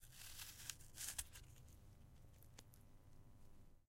Cutting an Apple in pieces, with a knife.
apple, effects, foley, food, sfx, short, sound-design, sounddesign